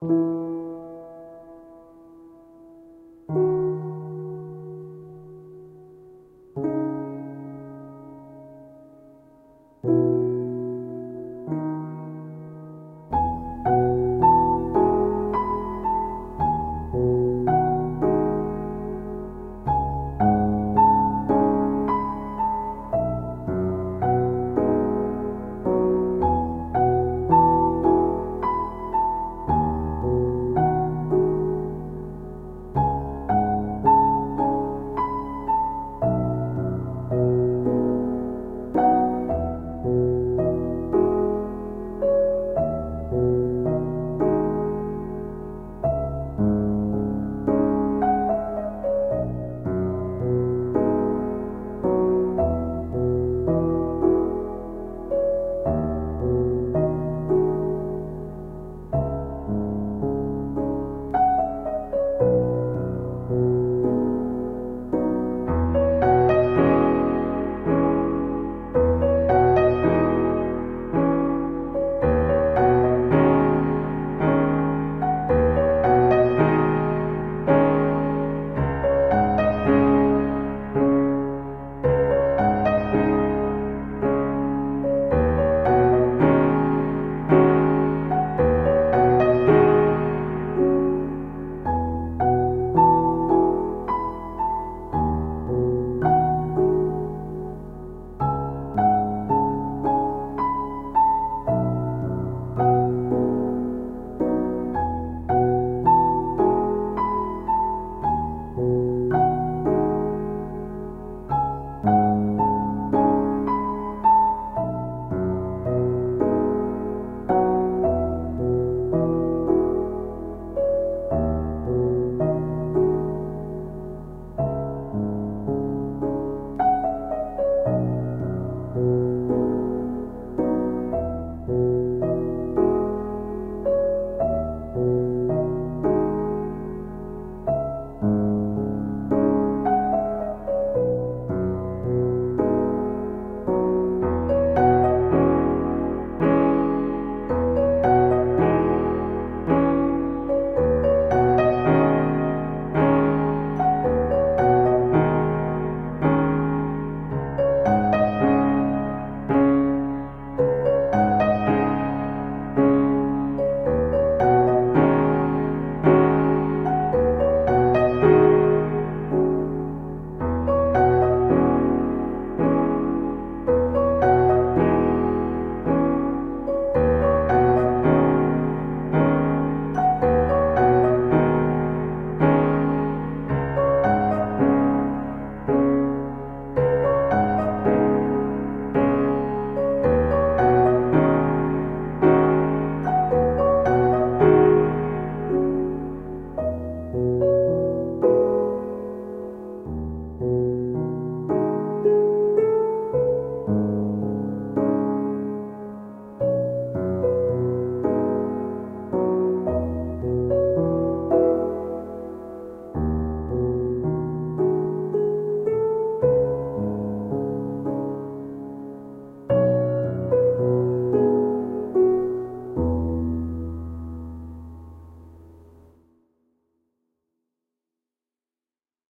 Emotional Piano Background Music
Track: 48
Title: Lost Time
Genre: Emotional Piano
Trying free kontakt library
background-music, emotional, piano